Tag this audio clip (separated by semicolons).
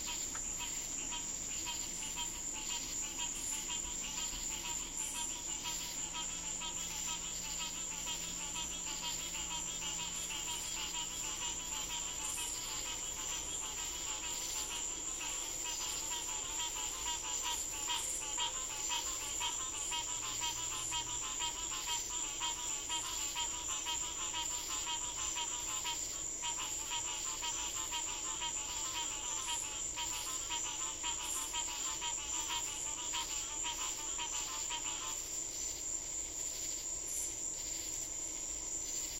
cricket; frog; frog-chorus; katydid